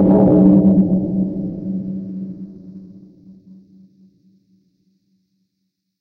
A deep thump or pounding sound.
percs, drum, percussion